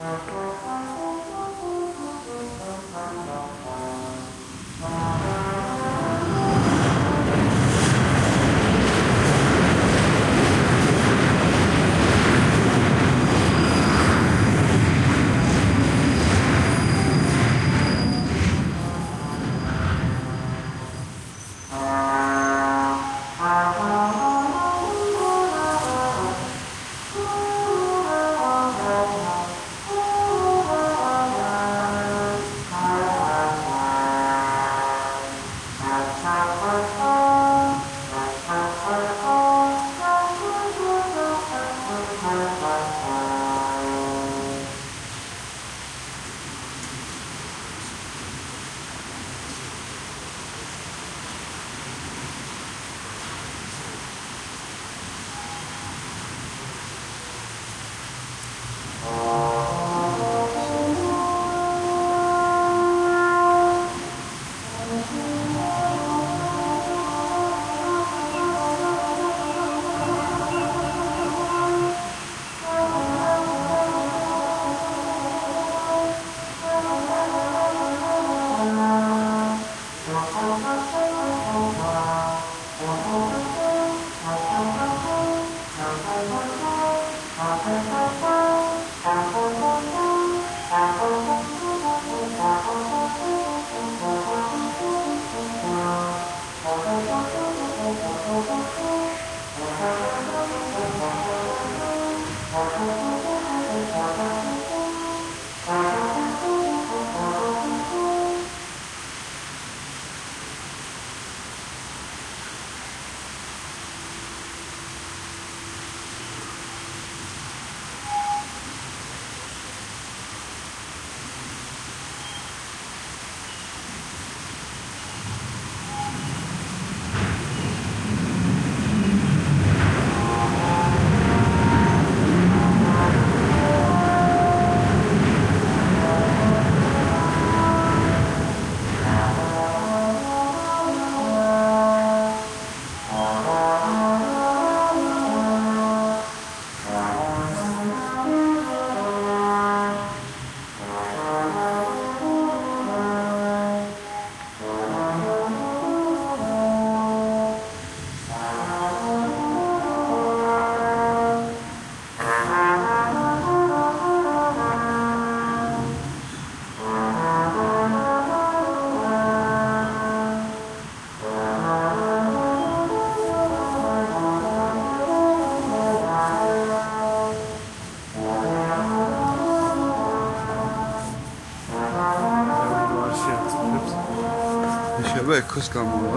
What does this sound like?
French Horn in Street
Young man playing French Horn while the overground trains passes by, in the streets of Vienna, Austria
TASCAM iM2
general-noise, down-town, background-sound, french-horn, atmo, soundscape, atmospheric, ambient, street, horn